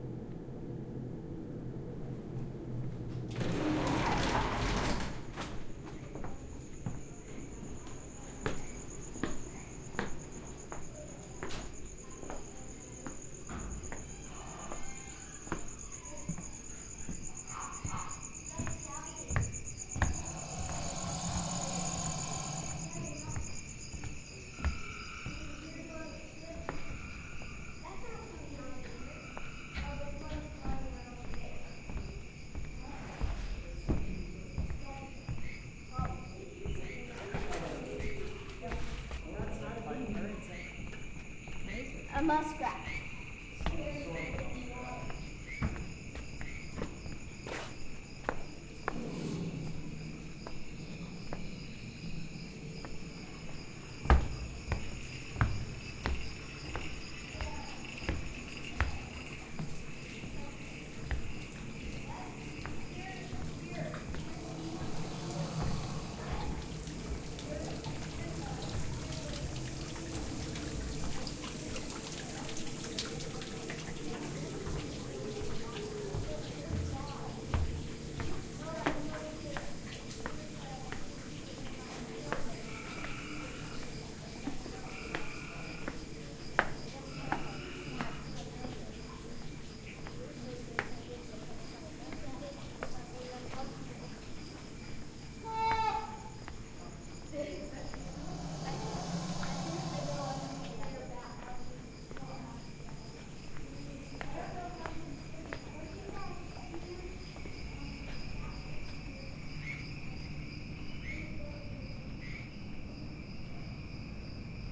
Kingdoms of the Night (A Virtual Walk-Through of the Swamp, with Footsteps)
A virtual walk-through of the swamp in the Kingdoms of the Night, with footsteps.